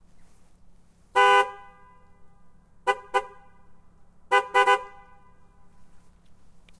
Honking the car horn of an Alfa Romeo MiTo on a parking lot in a forest in January 19.